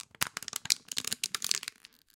this sound is made using something in my kitchen, one way or another
hit, kitchen, percussion